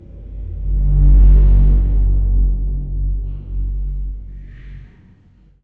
Hell's Foundation D
What would Hell's foundation sound like ?
large, movement, foundation, hell